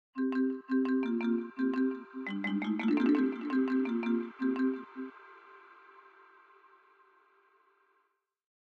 A marimba with multiple effects applied